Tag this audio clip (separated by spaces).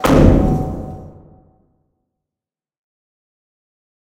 hydraulic machine machinery mech pneumatic robot